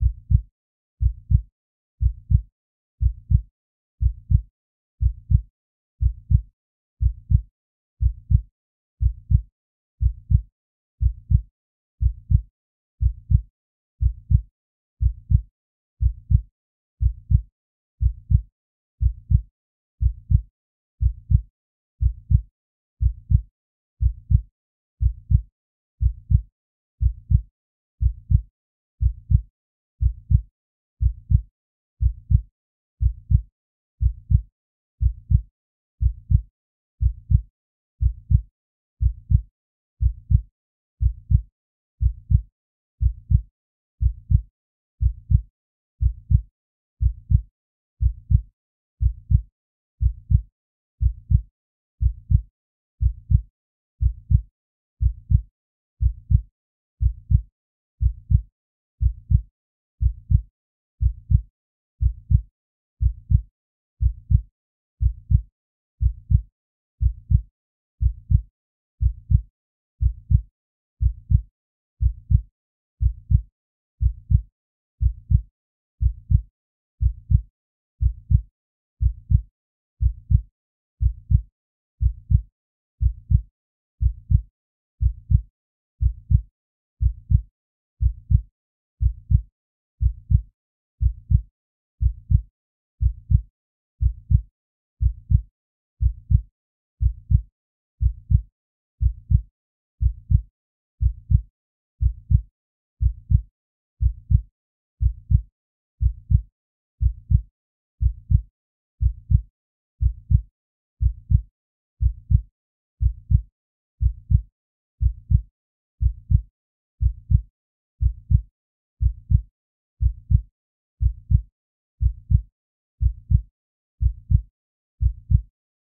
Human heartbeat, almost 61 beats per minute.
heartbeat, stethoscope, blood, heart, heart-beat, bits, body, kHz, beat, human, 32, 48, stereo